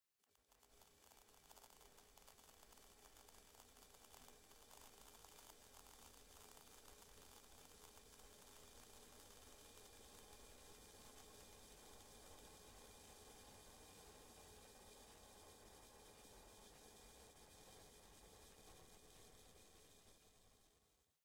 Thonk propanefire
granular processing of a mono recorded propane camping stove